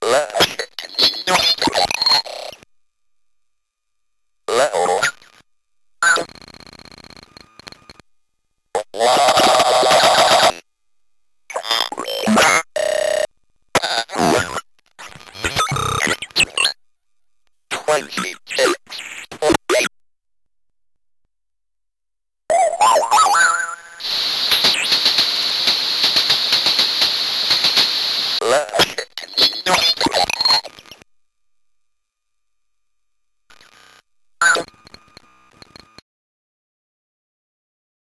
My circuit bent speak and spell run through the live cut plug-in. Tons of possibilities here to cut it up for one shots are use bigger pieces for loops.